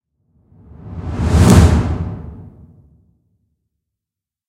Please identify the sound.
Couch Hit
once again i placed some microphones in my studio and recorded a hit on my couch. Useful for impacts of any kind